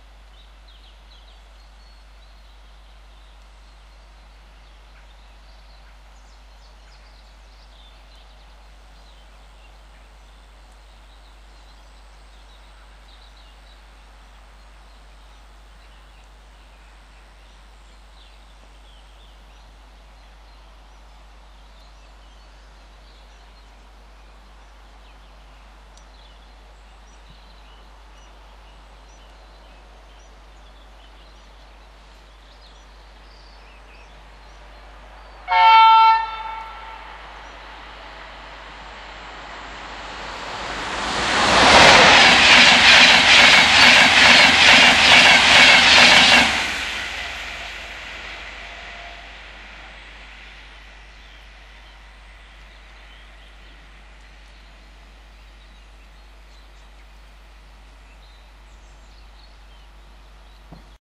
Train Pass Close 2
A recording of a close pass by an inter-city (fairly fast) train. It was recorded about 5m away from where the train passes. There are some birds singing and the low hum is from the electricity pylons that run overhead.
inter-city, field-recording, electric, train